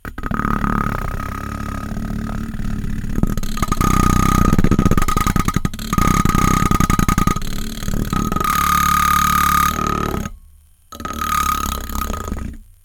MILK FROTHER ON MIC CABLE 1
Took hand held electric milk frother and played the mic cable with it. Sounds like a construction site.
drill, noise